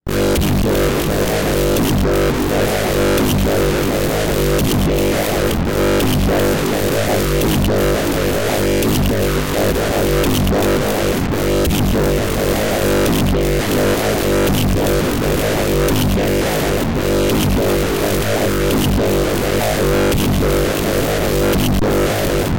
Distorted Wah Growl 1
Heavy, fast paced modulated loop intended for Drum and Bass music. Created in Logic Pro X by adding a bunch of effects to a bassline and pushing them all way further than they were intended to be pushed.
mean, music, electronic, modulated, 170BPM, synth, Key-of-G, G, loops, hard, synthesized, dnb, bass, synthesizer, angry, growl, 170-bpm, distorted, loop